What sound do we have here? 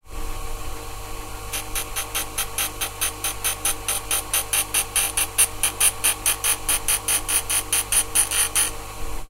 Used a piece of bamboo on a grinding machine.
bamboo, grinder